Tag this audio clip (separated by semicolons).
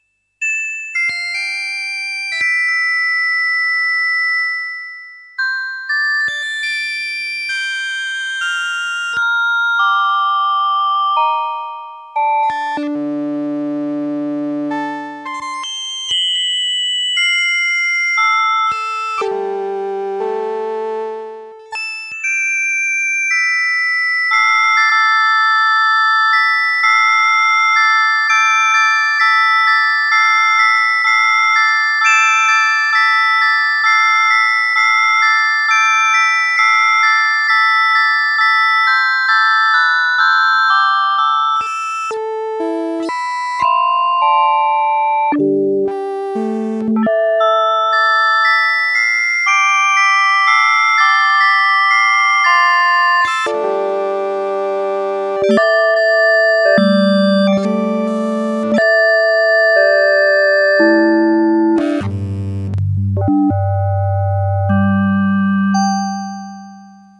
Keyboard; PSS-370; Yamaha